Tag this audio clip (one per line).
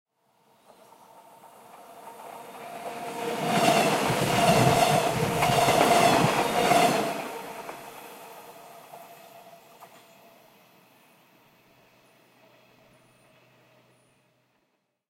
doppler
rail
gyro
electric
train